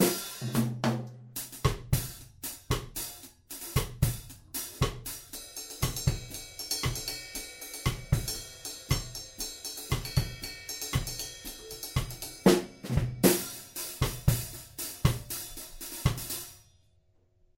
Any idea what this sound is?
drums straight backbeat ska
A few bars of drums, in a ska style beat. Supraphonic used.